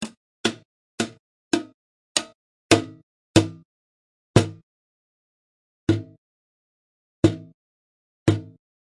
These are sounds of hitting a metal ammo box with a drum stick as well as my hands.
ammo-box, clang, industrial, metal, metallic, percussion